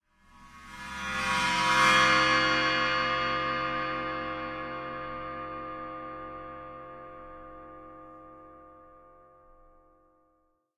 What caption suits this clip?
Bowed cymbal recorded with Rode NT 5 Mics in the Studio. Editing with REAPER.